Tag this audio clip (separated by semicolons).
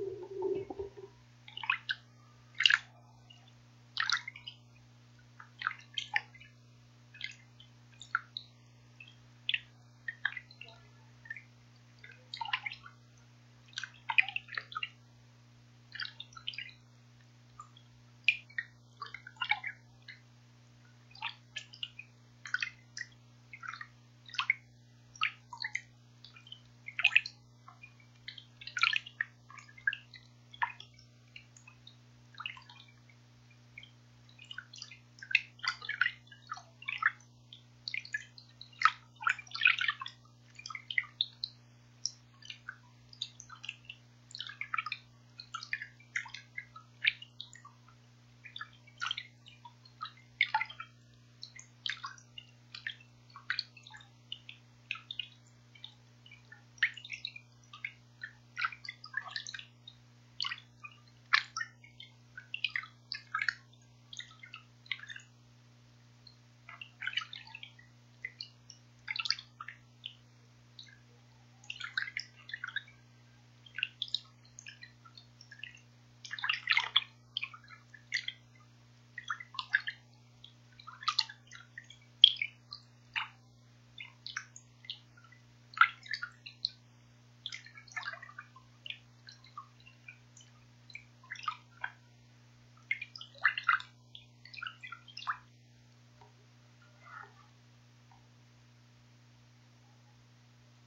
water; gout; drop